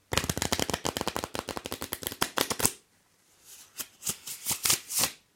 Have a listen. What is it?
Shuffling cards (riffle) 03
Sound of shuffling cards
riffle, shuffle, deck, card, mix, poker, shuffling